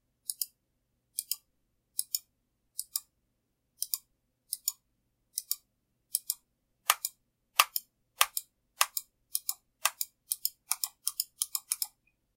Mouse clicks sound
clicking, sound, Mouse, clicks